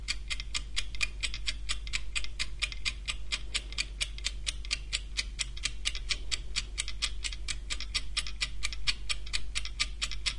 As requested: here is a test of some small condenser microphones.
For the test I used a Sony PCM-D50 recorder with the setting of 6 (only on the Soundman OKM II studio classic microphones was the setting on 7) and an egg timer, 15cm away from the microphones. These were spaced 90° from the timer (except the inside microphones of the Sony PCM-D50, which I had on the 90° setting.
Apart from the inside microphones of the the Sony PCM-D50 I used the AEVOX IM microphones and the Soundman OKM Studio classic, both of them binaural microphones, the Primo EM172 microphone capsuales and the Shure WL183 microphones.
Please check the title of the track, which one was used.
microphone, EM172, test, timer